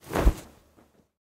clothes drop 2
cloth, Clothes, drop, material